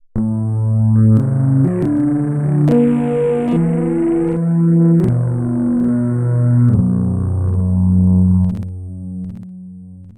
electric organ
This sound came out noisy before I realized and had placed
it here in the basket. I took a sine wave that was lower
in its original form than 440 hertz. I took subsamples and
changed the pitch, to create a very simple motif.